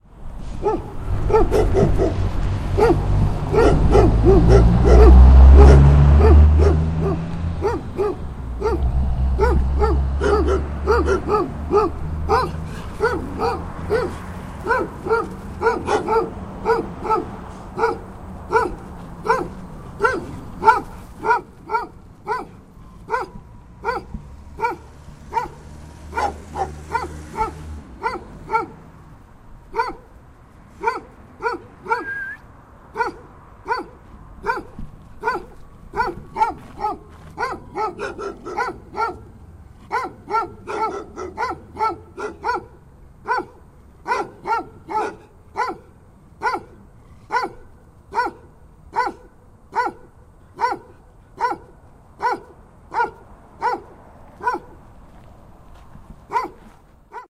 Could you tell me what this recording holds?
two dogs in Denver
This was recorded while my band was on tour in May 2006. Went out for a walk and got the crap scared out of me by these two junkyard dogs, so I went back to record them. AudioTechnica AT22 > Marantz PMD660 > edited in WaveLab.